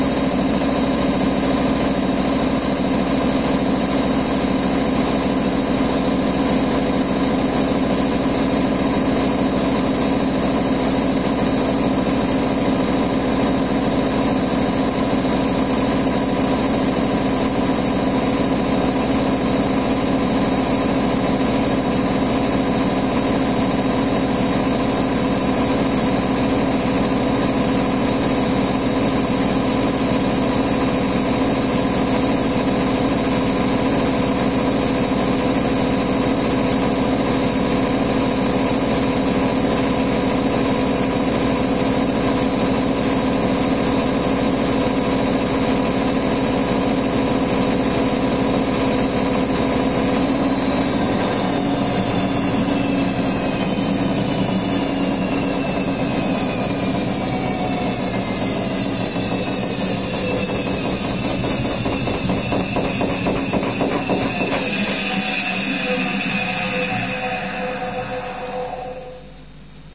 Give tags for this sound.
Car
Engine
Shunter
Train
Truck
Vehicle

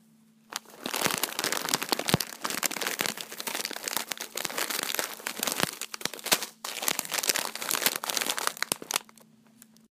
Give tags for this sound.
small
bag
plastic
cookies
Crumple

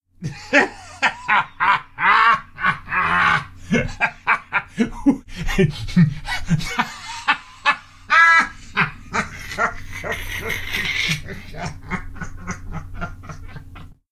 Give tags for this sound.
laughs
man

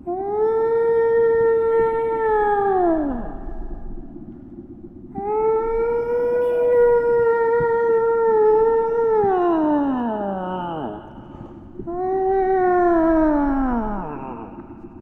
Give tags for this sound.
call
whale

